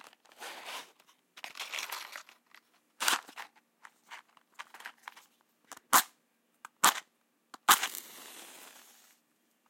This track includes the sound of me opening the matchbox and retrieving a match. Then I strike the match 3 times, on the final strike the match ignites and the flame Is audible. I recorded This using The XYH-6 microphones on the ZOOM H6 placed approx 3" From The capsules. Processing includes a High pass filter and Compression.